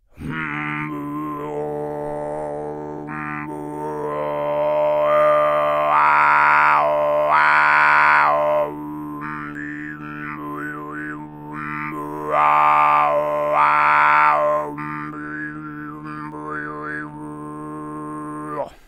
alfonso low 05
From a recording batch done in the MTG studios: Alfonso Perez visited tuva a time ago and learnt both the low and high "tuva' style singing. Here he demonstrates the low + overtone singing referred to as kargyraa.
kargyraa, overtones, throat, tuva